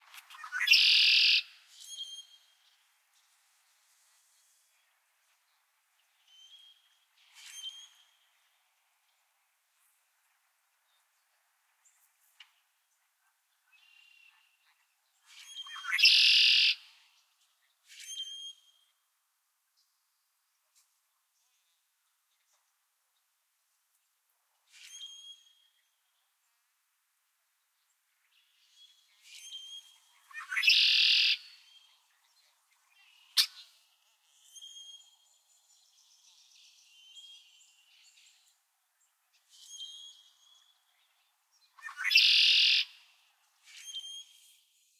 Redwing Blackbird - Miner's Marsh
A close-up field-recording of a red-winged blackbird in Miner's Marsh, Kentville, Nova Scotia. This file has been more heavily post-processed in iZotope RX than I typically do but there was a conversation nearby that spoiled the take. That said, the birdsong is very front and center and easy to extract if necessary.
Post cleanup in iZotope RX 7.